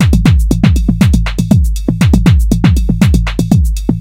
drumloop pattern made with reaktor ensemble drumscobenz c400.
greetings from berlin city!